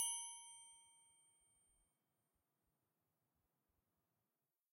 Softest wrench hit A#4
Recorded with DPA 4021.
A chrome wrench/spanner tuned to a A#4.